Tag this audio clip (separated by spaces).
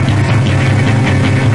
guitar humankind save